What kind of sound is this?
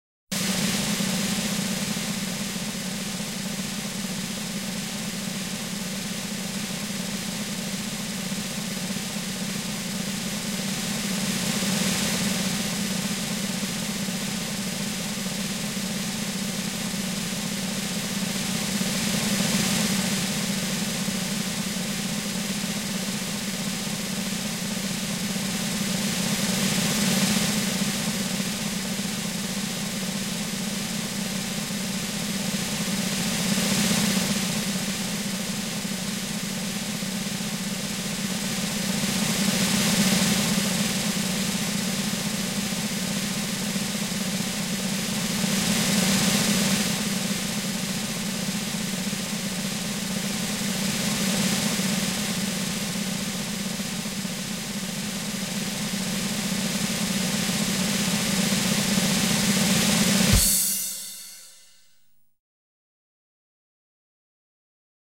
18 Long Drum Roll
A 60-second long drum roll, taken by Bobby Morganstein Productions. Take the plunge.
Sound ID is: 564206
Drum-Roll, drum, Bobby, 564206, drums, long, kick, 60-second, percussion, roll, snare, Morganstein